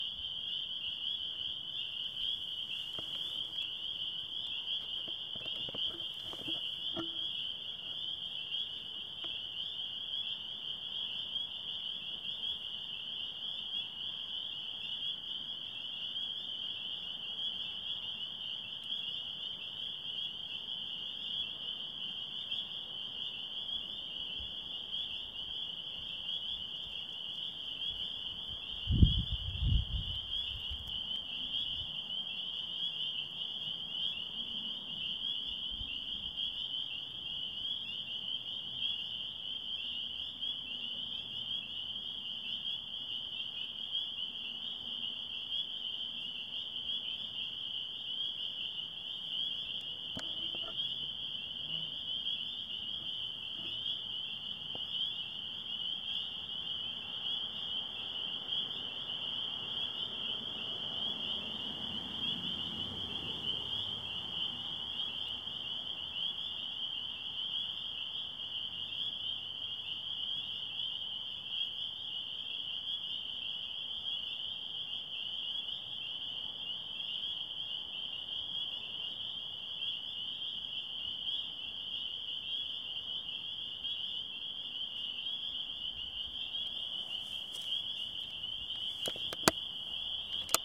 Peepers by Oyster Pond Rd-2
Spring peepers (tiny frogs that live in the wetlands in New England) recorded on the evening of 15 April 2012. Recorded in Woods Hole, Massachusetts using a Zoom H2.
field-recording, Woods-Hole, New-England, pond, swamp, wetlands, Frogs, spring, peepers